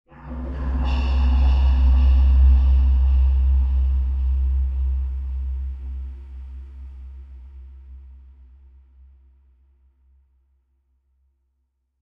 Result of a Tone2 Firebird session with several Reverbs.
ambient, atmosphere, dark, reverb